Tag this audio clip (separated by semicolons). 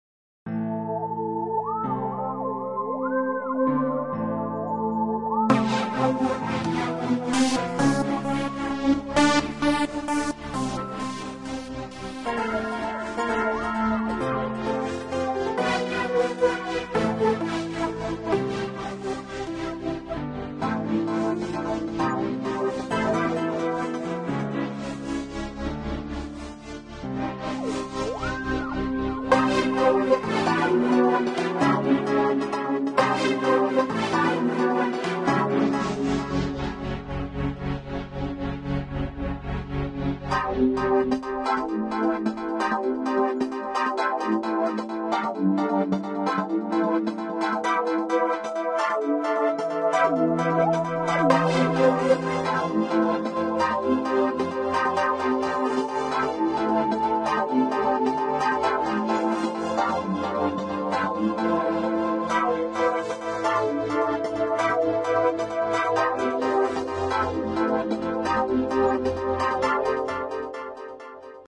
ambient; film; soundtrack